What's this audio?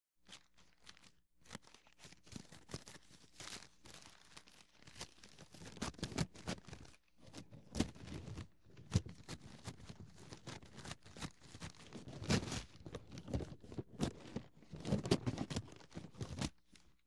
paper03-cutting paper#1
Large sheets of packing paper being cut with a pair of scissors. Could also apply to wrapping a present.
All samples in this set were recorded on a hollow, injection-molded, plastic table, which periodically adds a hollow thump if anything is dropped. Noise reduction applied to remove systemic hum, which leaves some artifacts if amplified greatly. Some samples are normalized to -0.5 dB, while others are not.
cut, cutout, christmas, wrapping, packing, cutting, paper, newspaper, scissors